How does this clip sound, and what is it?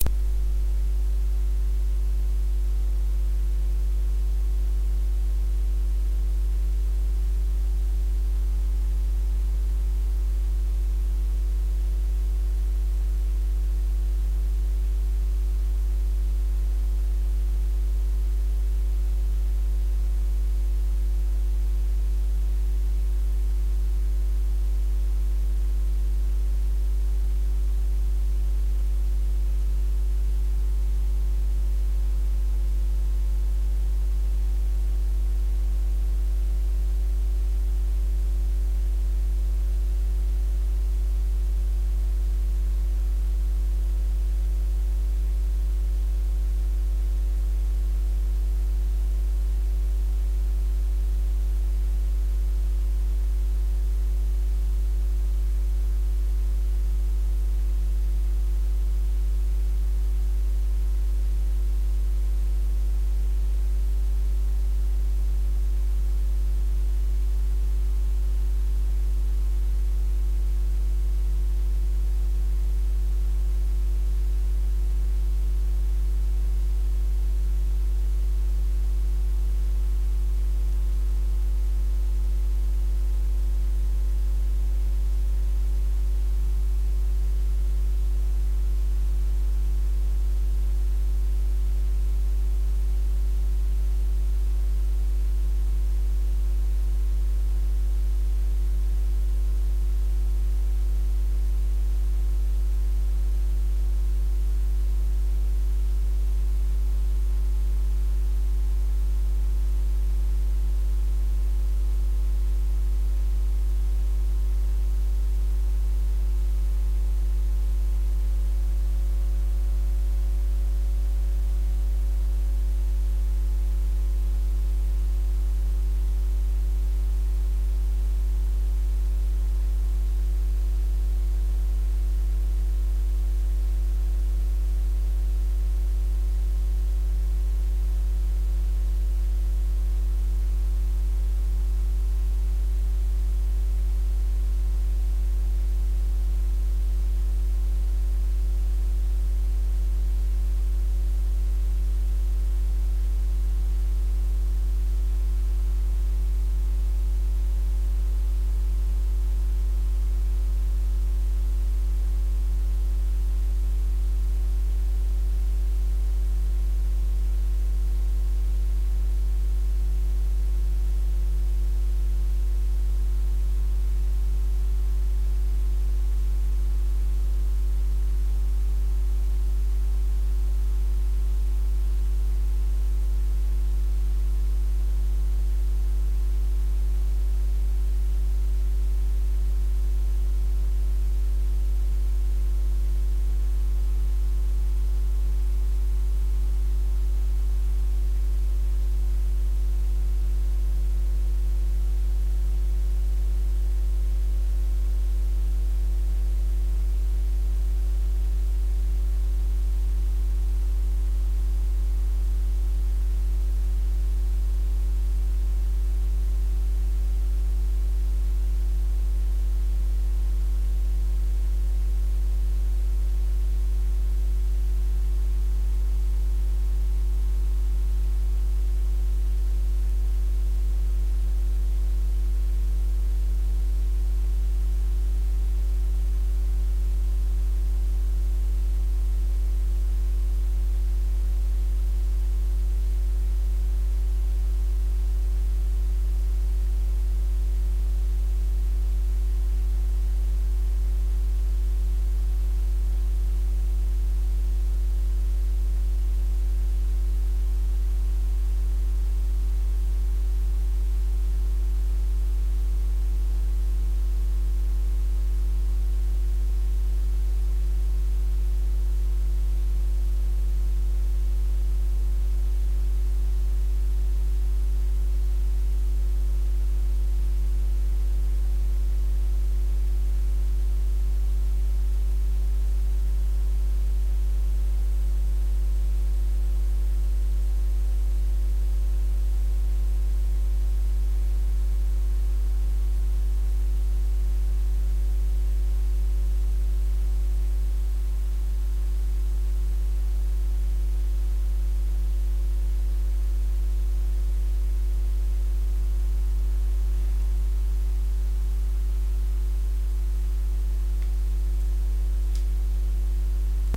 ECU-(A-XX)46
Cold, Qos, Fraser, Weather